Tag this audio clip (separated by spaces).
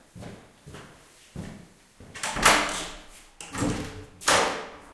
wooden wood slam sound door